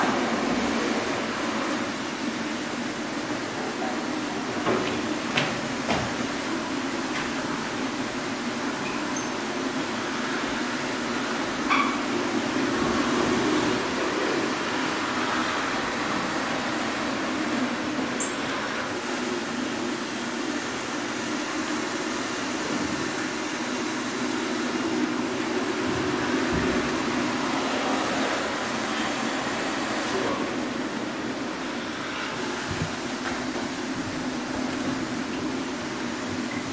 OM-FR-toilet
Ecole Olivier Métra, Paris. Field recordings made within the school grounds. Someone flushes the loo.